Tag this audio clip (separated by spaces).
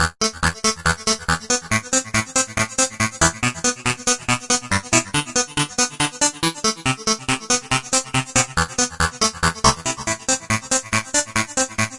fl-studio loop techno trance